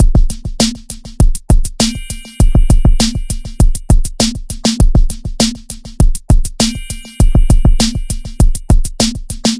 B03b 4measures 100bpm 4-4 electronic drum beat
B03 4measures 100bpm 4-4 electronic drum beat. Made with Native Instruments Battery.